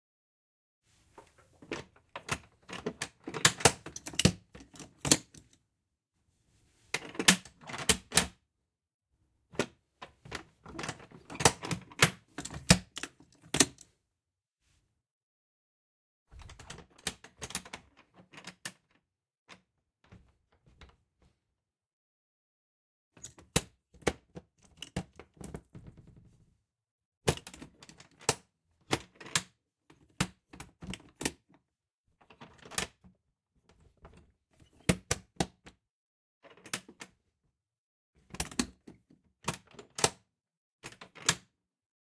Opening Antique Trunk - More Latches
An attempt to fill a request for the sound of rummaging through a wooden chest (trunk) filled with wooden toys...this features various latch/locks being used for opening/closing.
Gear: Zoom H6, XYH-6 X/Y capsule (120 degree stereo image), Rycote Windjammer, mounted on a tripod, late 1800s wooden trunk.
antique, latches, ADPP, chest, lock, tight, close, mic, objects, treasure, foley, latch, locks, wood, wooden, trunk